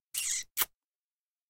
Cartoon Kiss cjohnstone
A kissing sound I made for a kids' audiobook.
cartoon, cute, female, funny, girl, kiss, kissing, lips, love, silly, Smooch, videogame, vocal, voice, woman